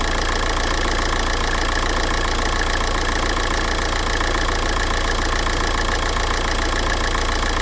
engine One level 1
Diesel engine revving
racing, engine, revving